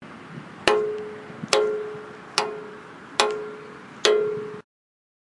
MySounds GWAEtoy Drums
TCR, field, recording